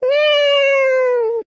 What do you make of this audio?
Computer game character sound. Created as part of the IDGA 48 hour game making competition.
character, cheer, computer, game, lizard, vocalisation, vox